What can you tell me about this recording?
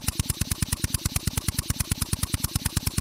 Pneumatic drill - Atlas Copco bv7 - Run freely low
Atlas Copco bv7 pneumatic running freely.
drill, air-pressure, 1bar, metalwork, concrete-music, pneumatic, 80bpm, motor, atlas-copco, labor, tools, work, crafts, pneumatic-tools